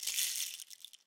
PDLL - Short
Palo de lluvia single short sound
sounds recorded with an akg c3000 in my home studio.
palo, nature, akg, lluvia, water, shaker, rain